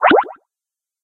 Beep / "ploop" created in Logic Pro